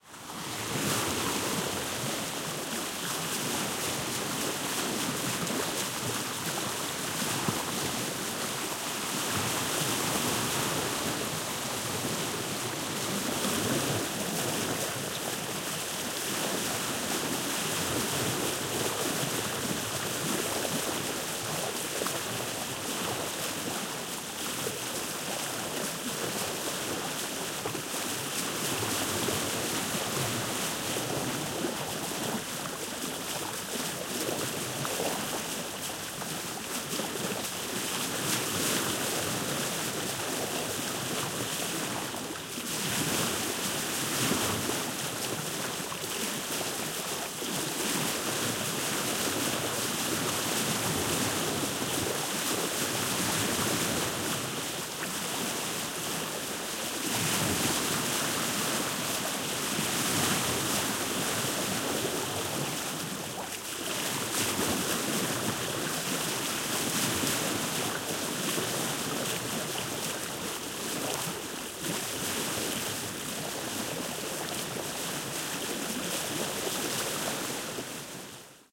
Sailing boat, bow wave (close perspective)
Bow wave of a ~8m sailing boat in calm water, close micing. No sounds from the sail (could just as well be a motor boat depending on what you layer the sound with ;) )
There is also recording with a more distant perspective of the bow wave.
boat, bow-wave, close, sailing, sailing-boat, sea, water, waves